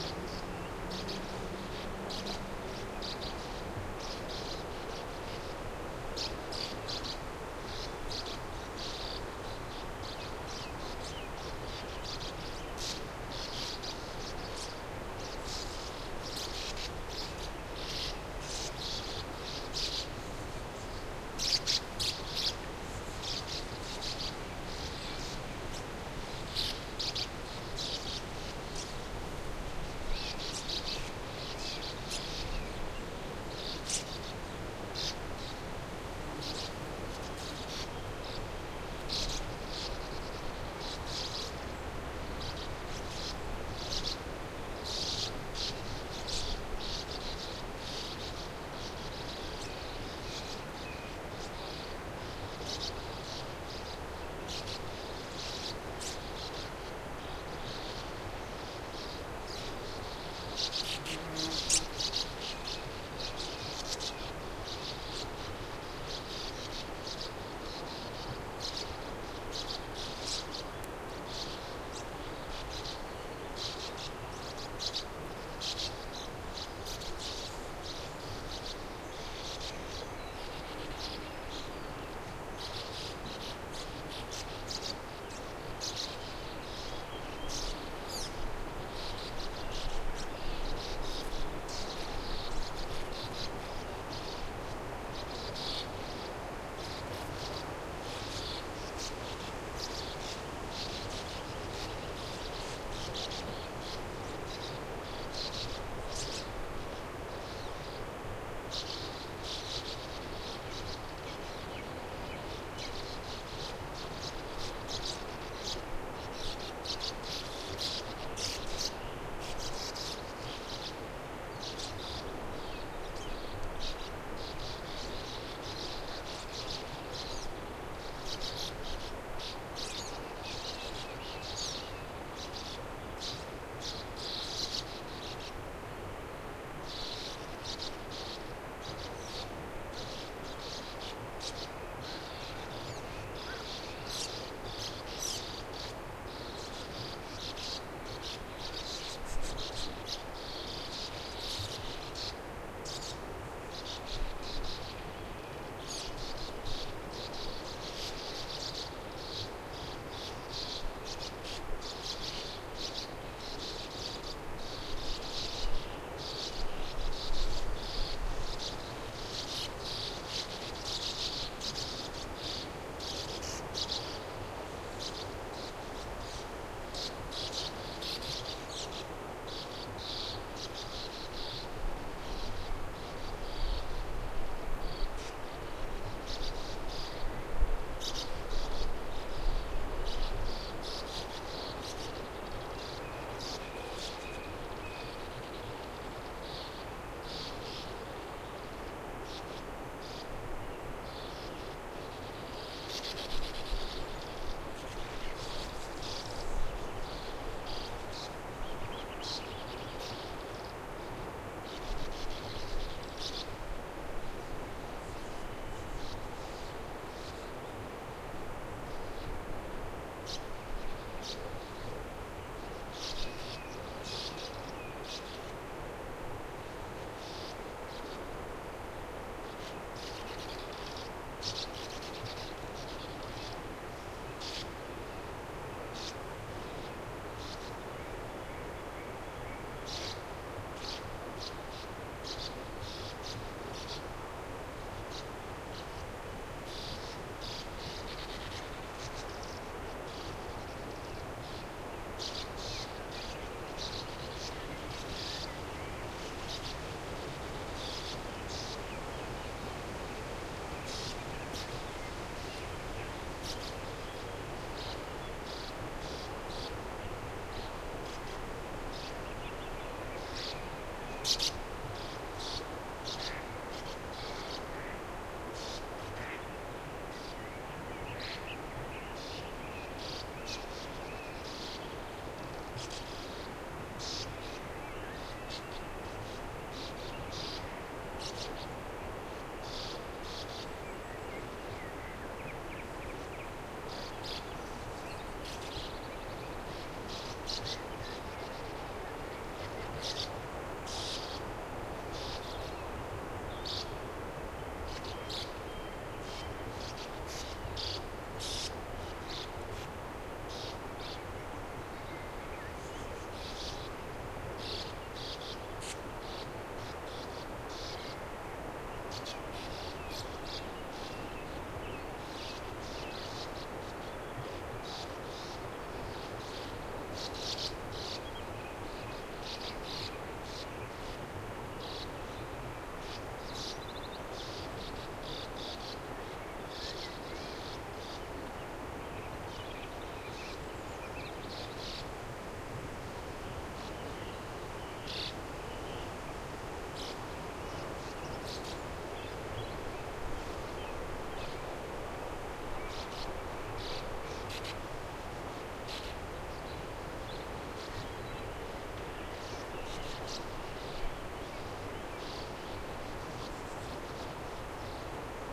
Sand Martins
This recording was done at the end of June 2008 in the „sandpit“ part
of
a quarry. The Sand Martin is sociable in its nesting habits. At this
spot there were nearly 100 pairs nesting close together. The nests are
at the end of tunnels, bored here in sand. You can hear the twittering
song of the birds who are on the wing, which becomes a conversational
undertone after they have settled in the roost.
Shure WL 183 microphones, a FEL preamp into an iriver ihp-120.